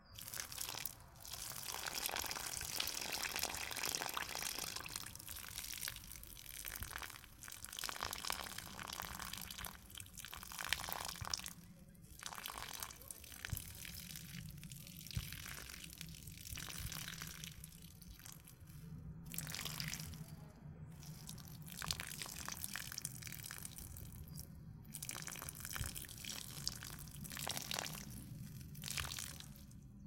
Peeing/Water dripping on soil
Peeing on soil or water dripping
Recorded with Zoom H2, edited with Adobe Audition.
earth, liquid, gurgle, water, splashing, drip, piss, soil, snow, wet, ground, field-recording, pissing, dripping, stream, peeing, trickle, splash